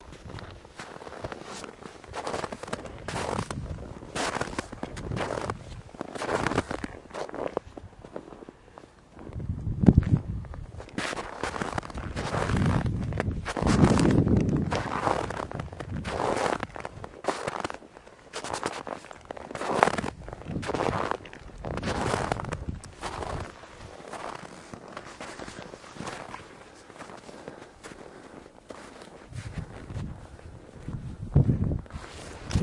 køupání-snìhu
Footsteps in the snow plus wind noise
snow, noise, wind, footsteps